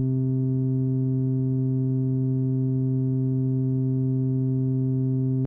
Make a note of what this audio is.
TX81z wave4
A raw single oscillator tone from a Yamaha TX81z. Also sort of a sine/square wave mix.
loop, sample, synth, synthesis, tone, tx81z, yamaha